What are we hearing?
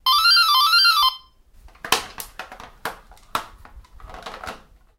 Doorbell playling ascending and descending melody. The sound of picking up the headphone and putting it back. Recorded with Zoom H1